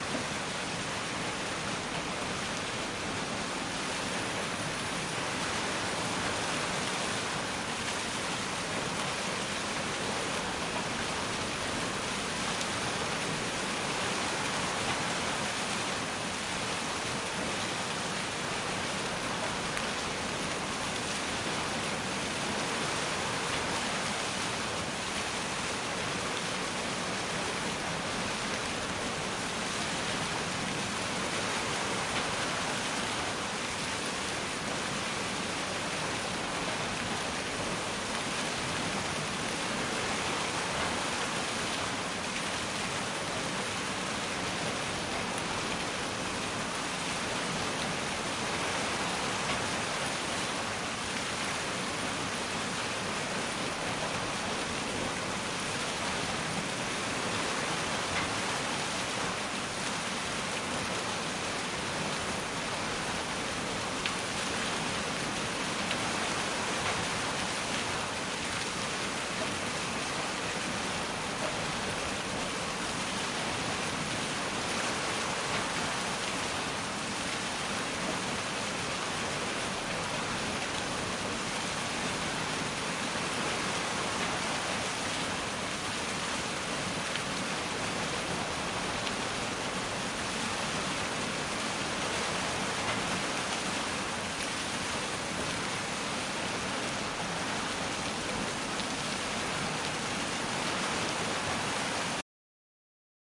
Water mill - mill wheel from the outside
These sounds come from a water mill in Golspie, Scotland. It's been built in 1863 and is still in use!
Here you can hear the mill wheel from the outside of the building.
historic, machinery, water-mill